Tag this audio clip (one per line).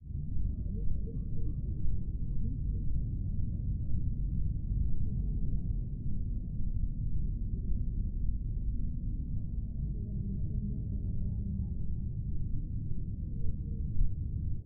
drone
rumble
low-frequency